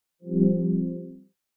A synthetic computer error sound I created.
computer, synth, error